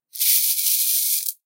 One long turn on the Cabasa handle
cabasa, percussion, instrument, beads, sliding, metallic, scraping, metal